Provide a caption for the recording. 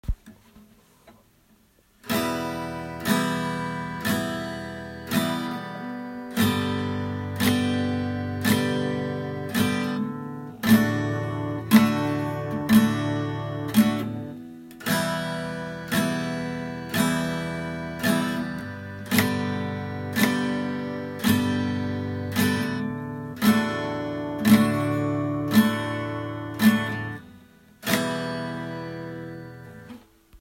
Three chords of a guitar played repetedly